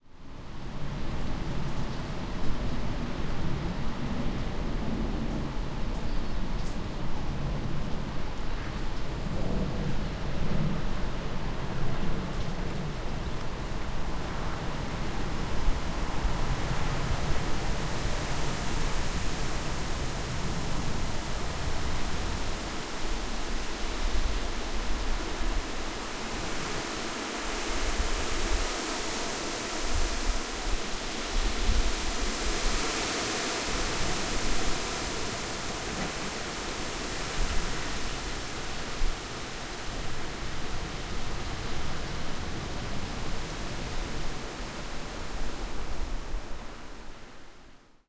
Audio of moderate winds blowing through trees and bushes on the morning of Storm Brian. I have applied some EQ to cut out wind interference.
An example of how you might credit is by putting this in the description/credits:
The sound was recorded using a "H1 Zoom recorder" on 21st October 2017.